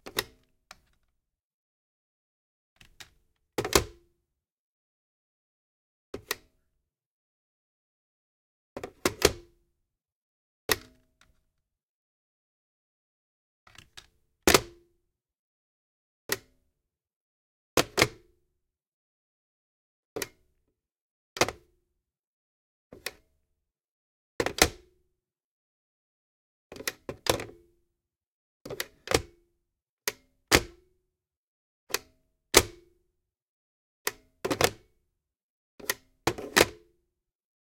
phone hotel pickup, put down various
phone, hotel